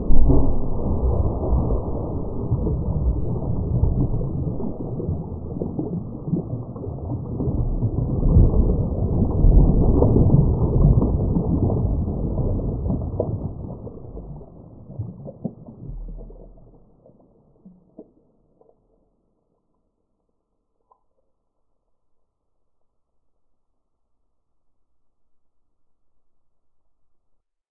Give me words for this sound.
Simulated underwater landslide, made from my Ploppy_1 to 4 series field-recordings.
submerged-quake, earthquake, earth, bubble, quake, tecktonic, tsunami, foley, titanic, sinking, water